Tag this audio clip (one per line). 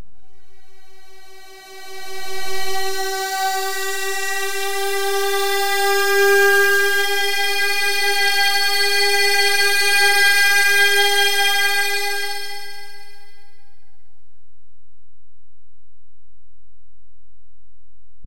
electronic
metallic
ringy
string
suspended
violin